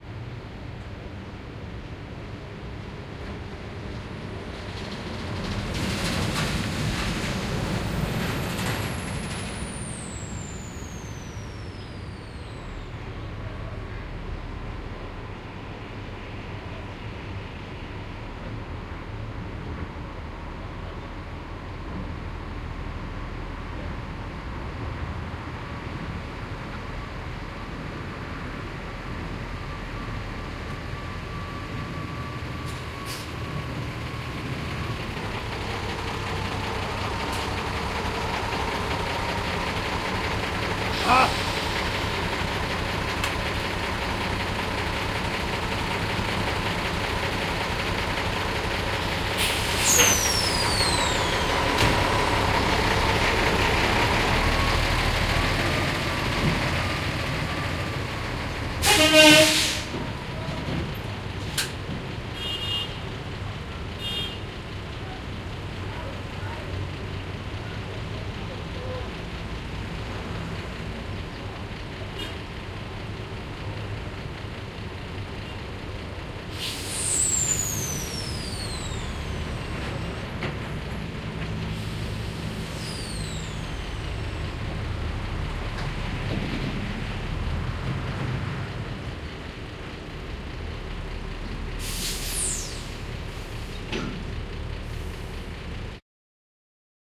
Truck stop2
A truck pulls out of a truck stop at a steel mill after leaving the weighbridge.
This truck also moves from right to left.
Sound professionals SP-TFB2s into Zoom H4N Pro.
drive, stop, noise, passing-by, stopping, car, engine, truck